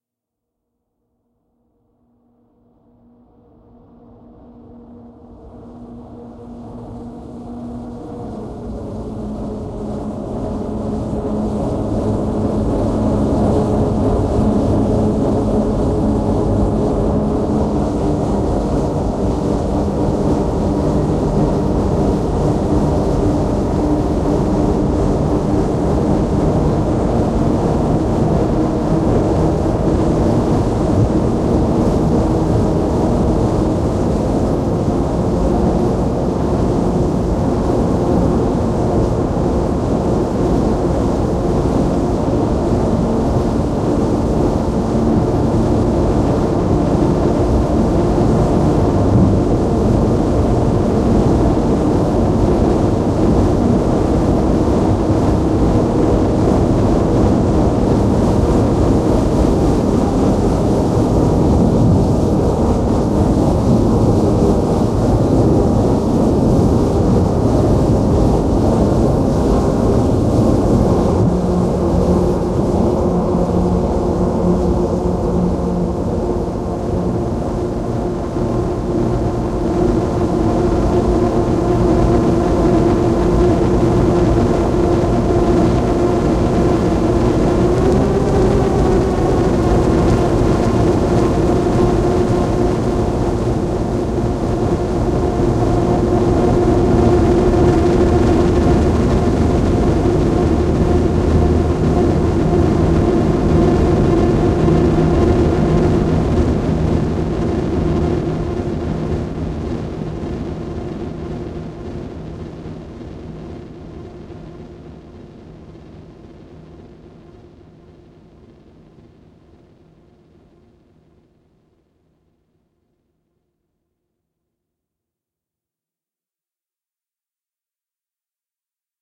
black hole shower drain
Short music track made with a Teenage Engineering OP-1 on May 2018.
Consists of many many layers of a shower sound recorded with a Zoom H4n Pro, sent through an arpeggiator to create a choir-like effect. Some additional mastering (mostly spatialization and compression) was additionally done on Ableton Live.
shower, h4n-zoom, music, teenage-engineering, ambient, song, noise, op-1, stereo